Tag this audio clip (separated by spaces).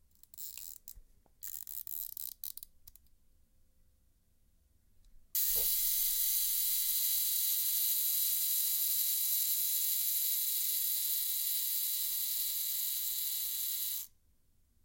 35mm pro-tools camera full-timer